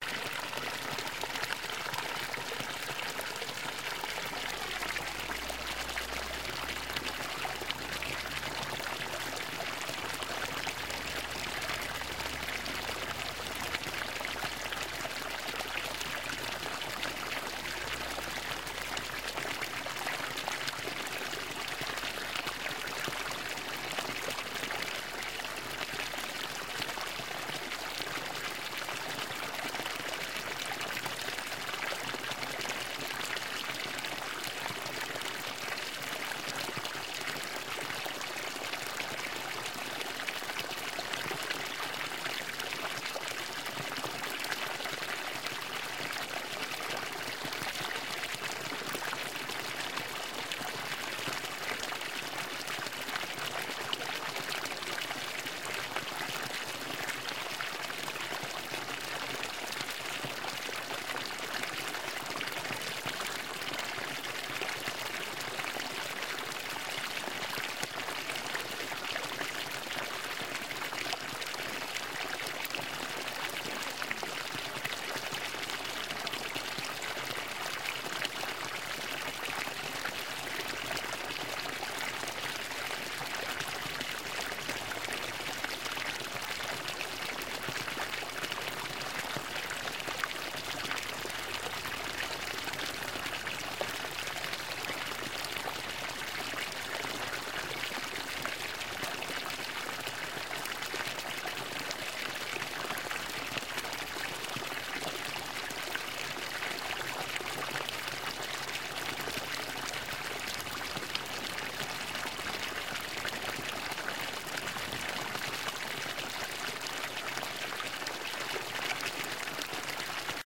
Water from a wooden fountain.
fountain, water, wooden-fountain